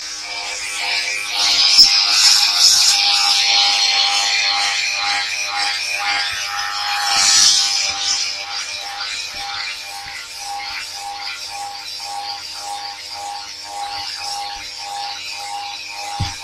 Tira borboto
sound of removing lint from clothing